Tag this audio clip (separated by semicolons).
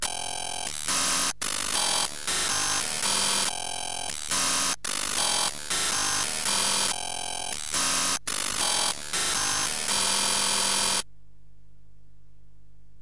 slice; random; pattern